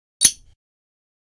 36 llenando vacio
golpe de dos copas de vidrio
golpe, brillante, vidrio